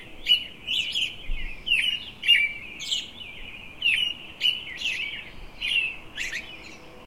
Sounds of birds being busy in the morning.